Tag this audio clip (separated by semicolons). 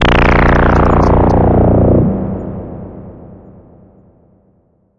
abstract; digital; effect; electric; electronic; freaky; future; fx; glitch; loop; noise; sci-fi; sfx; sound; sound-design; sounddesign; soundeffect; strange; weird